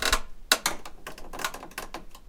Creak Wood Floor 01
A sound of a creack on a wooden floor recorded on set for a short film.
This is one of the many, so check out the 'Creacks' pack if you need more different creaks.
Used Sony PCM-D50.
Wood, Creak, Floor